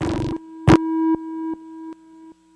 Some weird noise and beeps coming from a Yamaha TX81z FM synth.
beep, bleep, blip, digital, echo, fm, sound-design